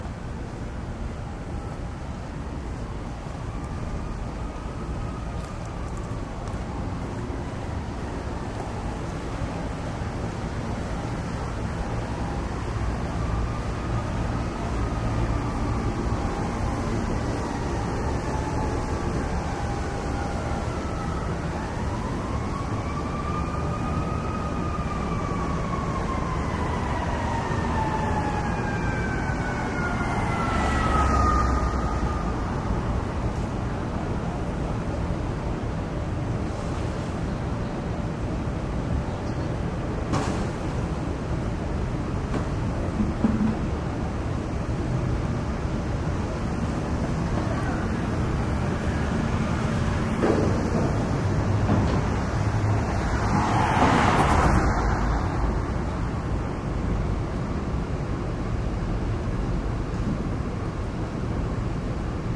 Sounds recorded while creating impulse responses with the DS-40.